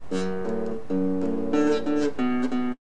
This is a recording of me playing the guitar (actually I can't play the instrument) made down in my cellar with a very bad equipment.
bad-recording, guitar, sound